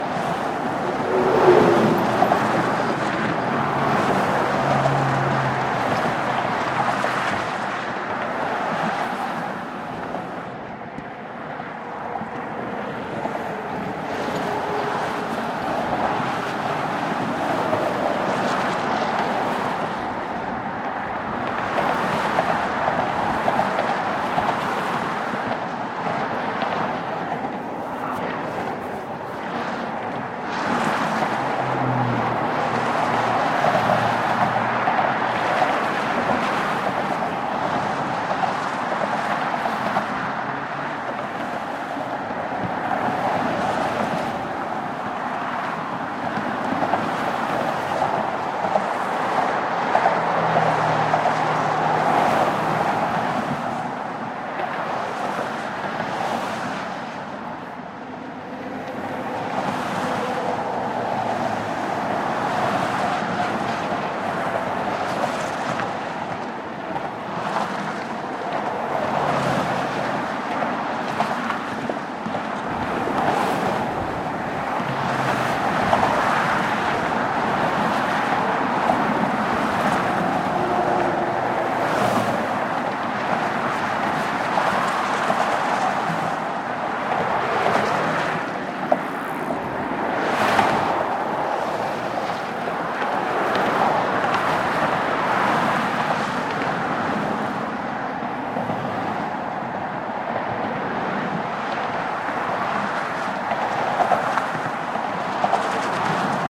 GGB 0411 Ambient Span CE N
Ambient recording of traffic noise on the Golden Gate Bridge main span, east side pedestrian walkway. Wind was measured between 7 and 14 mph between SSW and NNW headings. Recorded August 20, 2020 using a Tascam DR-100 Mk3 recorder with Rode NTG4 wired mic, hand-held with shockmount and WS6 windscreen. Normalized after session.